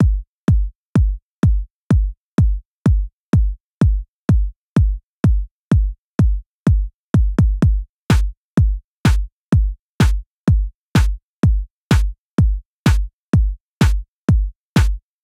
Loop para crear cualquier estilo de house,minimal o progressive.Creado por Chronic Records(Avila)para los amantes de la música electrónica.